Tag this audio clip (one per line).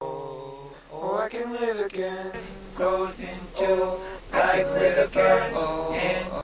acapella
acoustic-guitar
bass
beat
drum-beat
drums
Folk
free
guitar
harmony
indie
Indie-folk
loop
looping
loops
melody
original-music
percussion
piano
rock
samples
sounds
synth
vocal-loops
voice
whistle